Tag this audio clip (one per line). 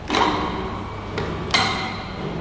Buzz
electric
engine
Factory
high
Industrial
low
machine
Machinery
Mechanical
medium
motor
Rev